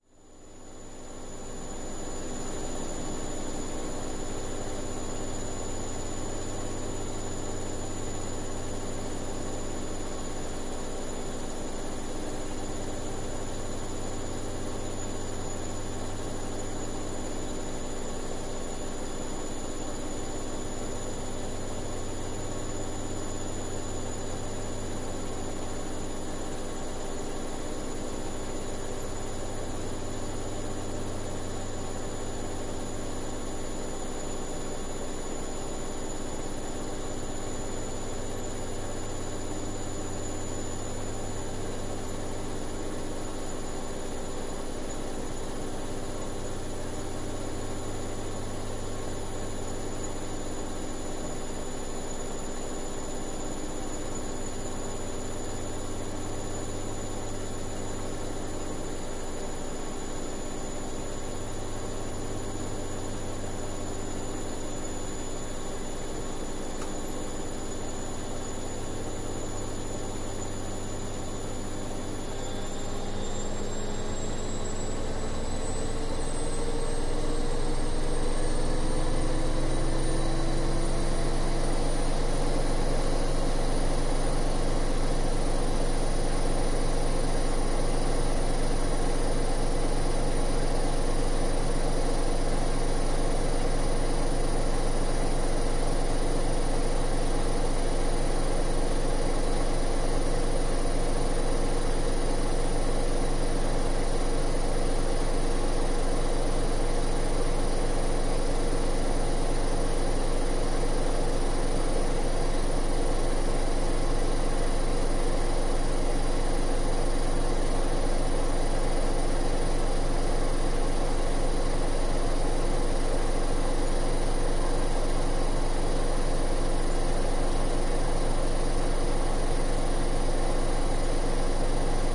Washing-Machine-spin cleaned up
A washing machine on a short spin cycle
spin-cycle, washing-machine